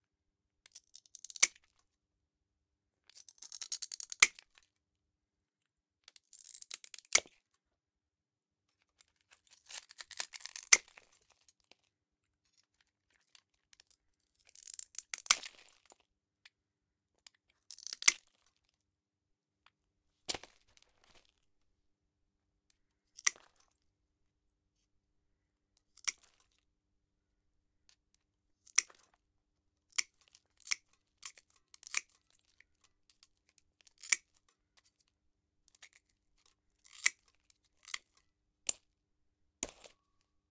Tile cutting
Using a tile cutter to cut mosaic tiles at a slow and fast pace sequentially.